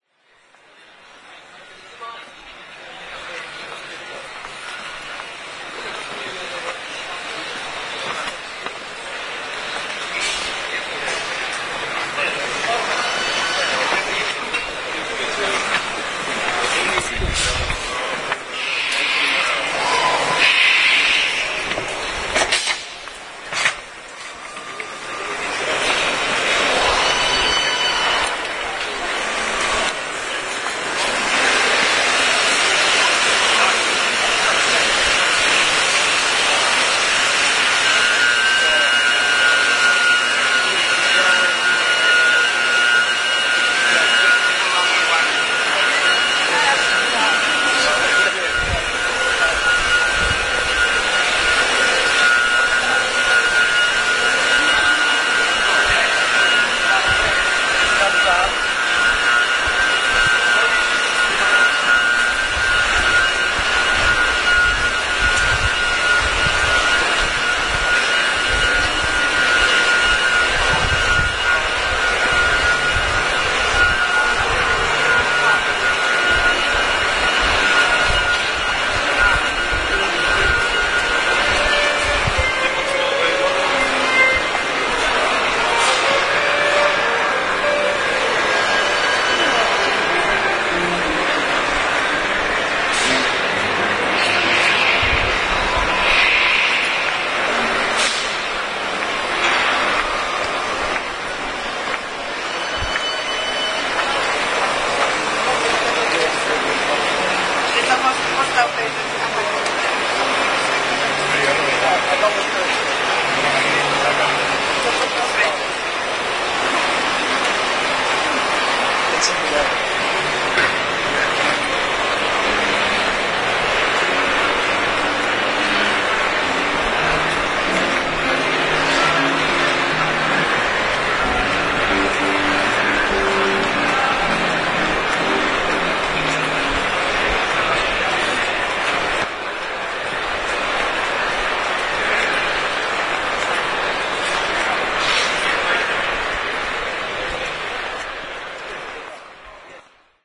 07.11.09: between 13.00 and 15.00, the KAMIEŃ - STONE 2009 Stone Industry Fair(from 4th to 7th November) in Poznań/Poland. Eastern Hall in MTP on Głogowska street: the general ambience of the fair hall
crowd, hall, mtp, noise, poland, poznan, steps, stone-fair, voices